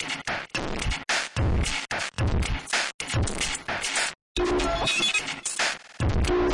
SIck BEats from The block -
Sliced and Processed breaks beats and sick rythms for IDM glitch and downtempo tracks Breakbeat and Electronica. Made with battery and a slicer and a load of vst's. Tempos from 90 - 185 BPM Totally Loopable! Break those rythms down girls! (and boys!) Oh I love the ACID jazZ and the DruNks. THey RuLe!
acid, breakbeat, drumloops, drums, electro, electronica, experimental, extreme, glitch, hardcore, idm, processed, rythms, sliced